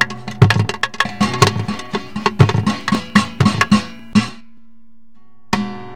ragga percussion, just like the name. how ironic!